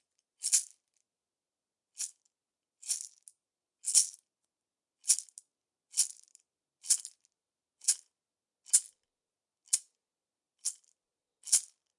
Sonido largo de maraca